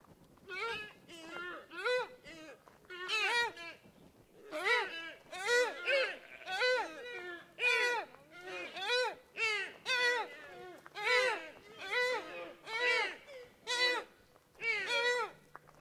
Here is a strange meewing sound that a group of deer made at a nature park.